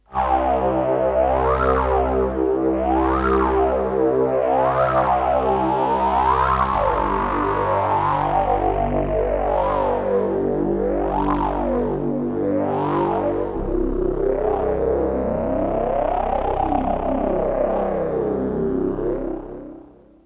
My, rather extreme, attempt at creating a super-square sound on the D50.
D50, SuperSquare, Synthesizer